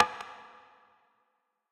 Sine waves processed randomly to make a cool weird video-game sound effect.
electronic, pc, fx, game, random, effect, glitch, processed, video